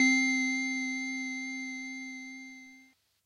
Preset Vibraphone C
Casio HZ-600 sample preset 80s synth
80s, Casio, HZ-600